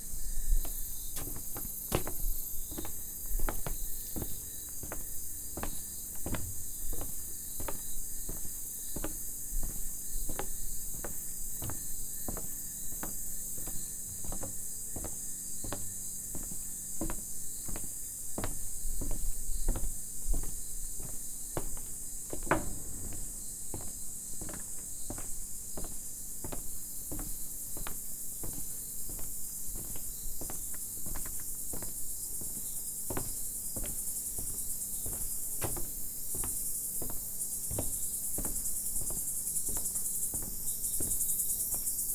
Jungle Walking on wood Choco
Walking on a wooden bridge at Choco, Colombia